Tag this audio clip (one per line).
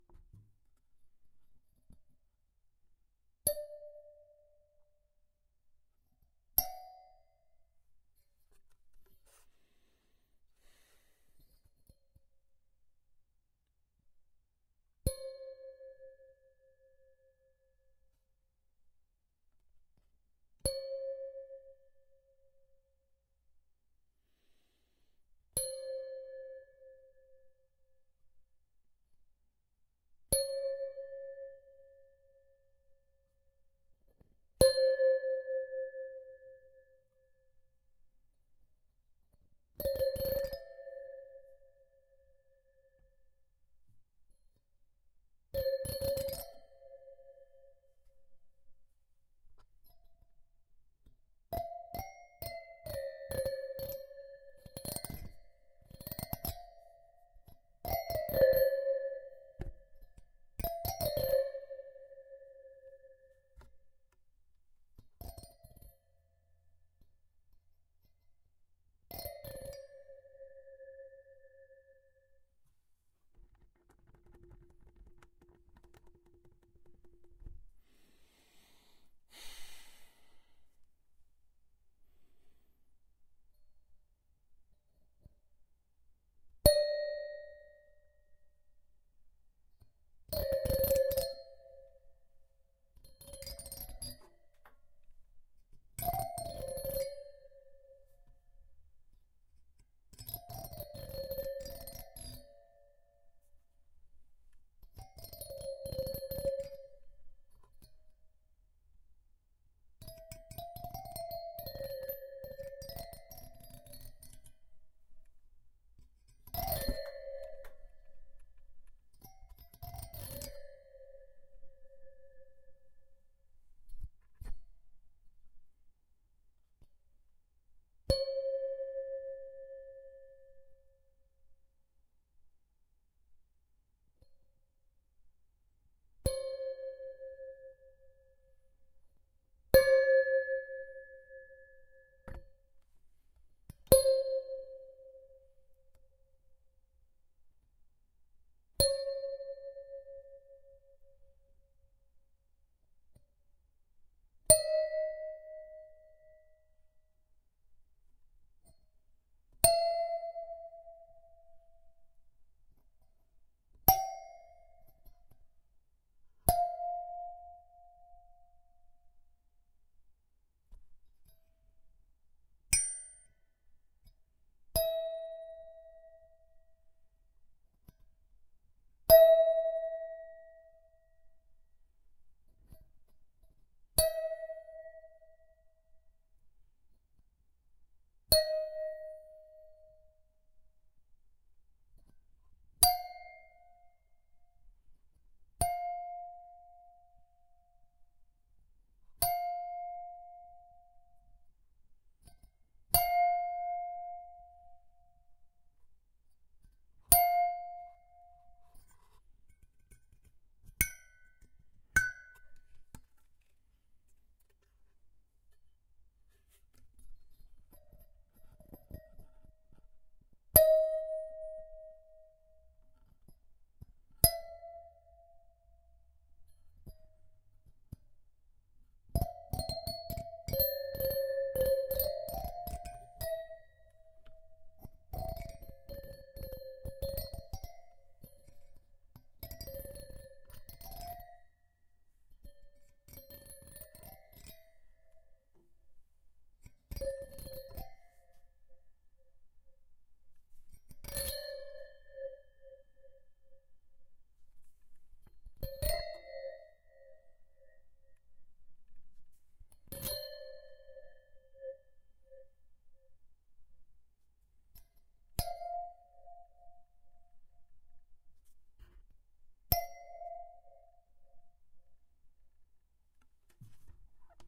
computer
hardware
videocard
horror
scary
chime
waterphone
creepy
dissonant
spooky
bell
ding
eerie
plucked